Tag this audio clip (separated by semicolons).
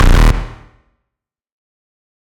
switch; select; menu; game; ui; button; click; option; interface